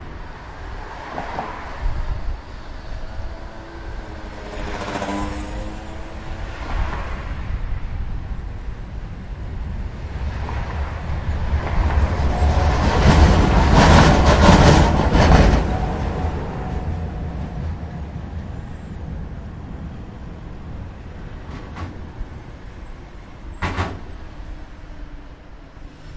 Bridge Traffic Budapest
Zoom H1. Cars and Tram passing over Liberty Bridge Budapest. Cars going of bridge join makes clunk noise.
Bridge, Passing, Streetcar, Traffic, Tram